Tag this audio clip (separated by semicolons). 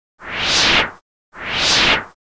effect; fx; horror; mono; movement; noise; zombie